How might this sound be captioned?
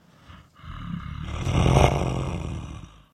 Some monster voice.
dragon, monster, reverb, voice, werewolf